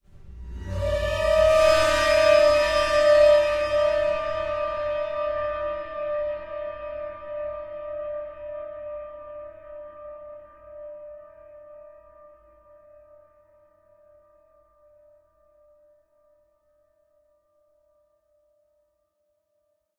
Horror Cinema 3 2014
Recorded using a detuned violin. It is the same recording used in my "Horror Cinema 4" audio file, but with this I used more pitch shifting and a little extra detuning. Was processed through absynth 5 with added effects in Cubase.
Atmosphere, Dark, Movie, Film, Creepy, Violin, Spooky, Horror, Ambient, Cinematic